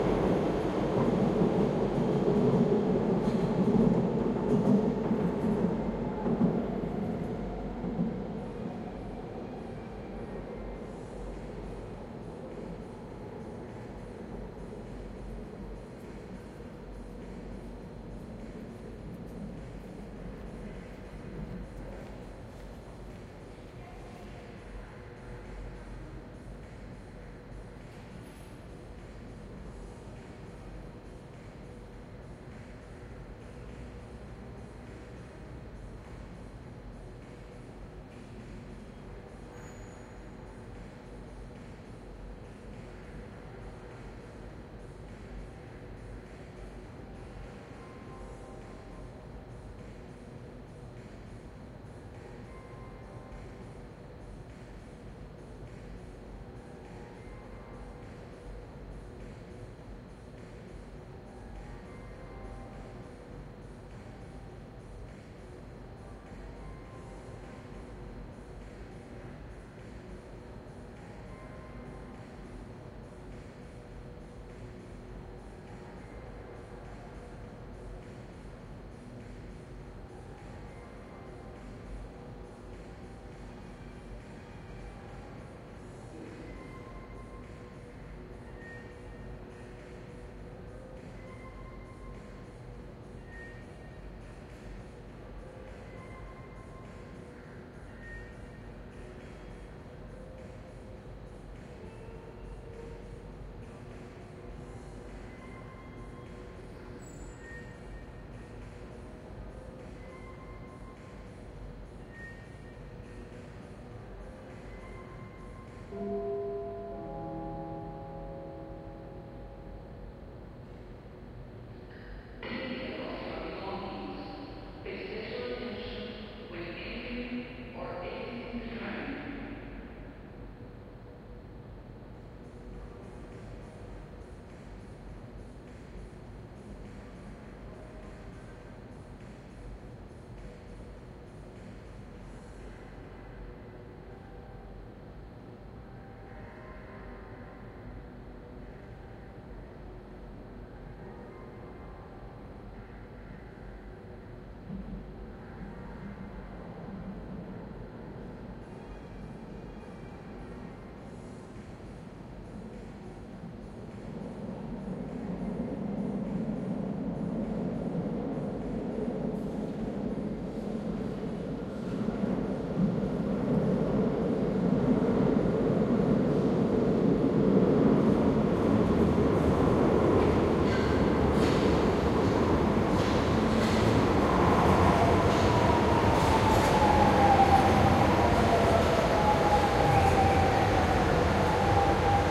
Interior Estação de Metro Roma-Areeiro
Metro station "Roma-Areeiro" in Lisbon.
Ambiente sound.
AMB, city, field-recording, Int, Lisboa, lisbon, metro, ms, stereo, train